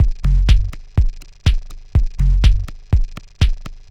Addon loop 1-123 bpm
bpm, loop